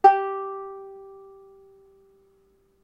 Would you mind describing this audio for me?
Hard plucking of the G string on a banjolele.